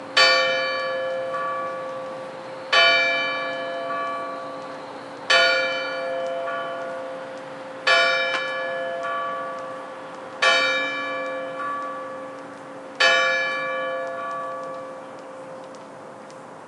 Church Clock Strikes 6
The church bell strikes 6 oclock
bells, church, cathedral, bell, Strikes, church-bell, ringing, clock